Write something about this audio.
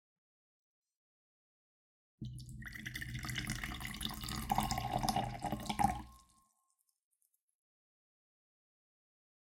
Pouring a liquid into the glass/long.
liquid, pouring